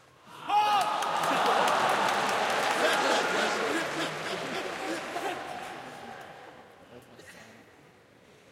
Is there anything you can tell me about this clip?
181106 crowd cheer applaud laugh hall
Crowd Cheer Applaud with laugh in a very big hall